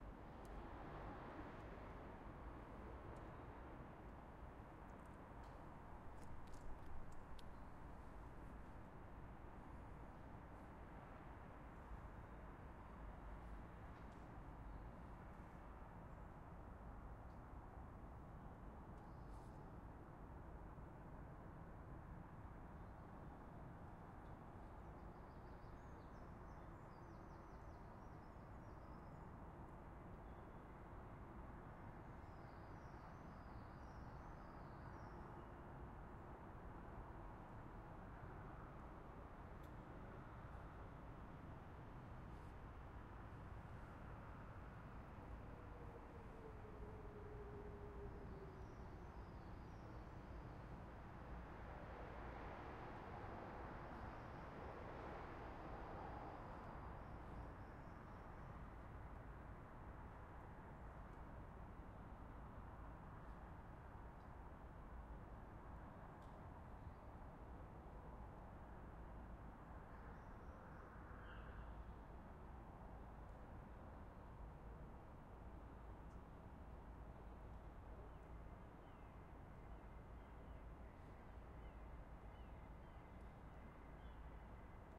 London UK Ambience Feb 2013 01
This is a stereo recording of city ambience in Greenwich, London, UK taken at around 4 in the morning. This recording is unedited, so it will need a bit of spit and polish before use.
ambience, atmos, atmosphere, evening, greenwich, london, night, night-time, suburban, suburbs, uk, urban